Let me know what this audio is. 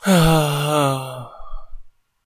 What happened to this poor person :( :(
Recorded for the visual novel, "The Pizza Delivery Boy Who Saved the World".